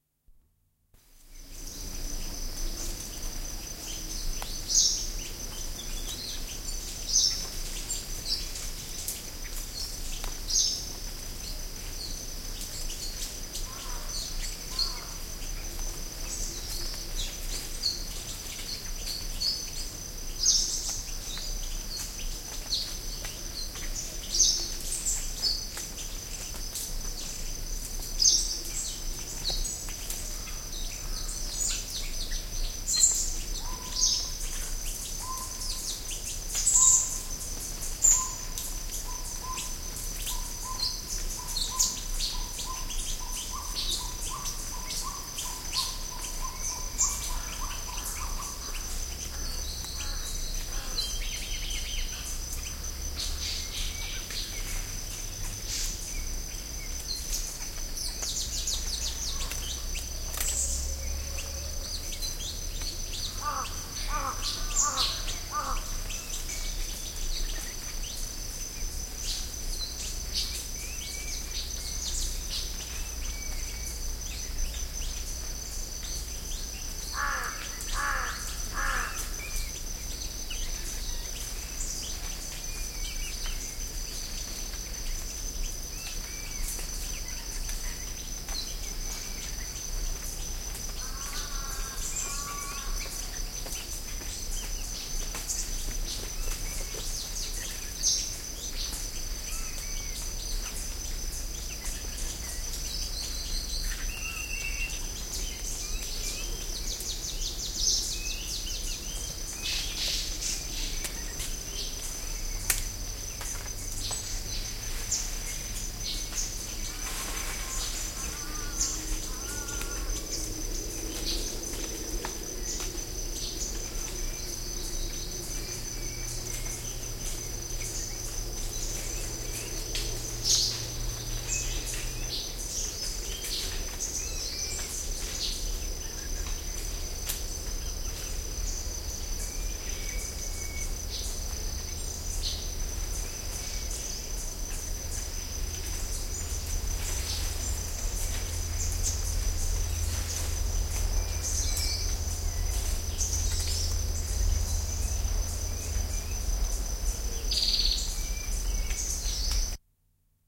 Forest. Quiet steps, birds and crickets // Metsä. Hiljaisia askelten ääniä, lintuja ja sirkkoja.
Paikka/place: Intia / India
Aika/date: 1982